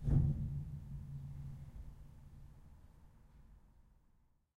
Pedal 05-16bit
piano, ambience, pedal, hammer, keys, pedal-press, bench, piano-bench, noise, background, creaks, stereo
ambience, background, stereo, bench, noise, piano-bench, creaks, keys, pedal, piano, pedal-press, hammer